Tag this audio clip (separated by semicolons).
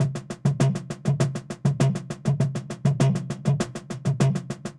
processed; drum-loop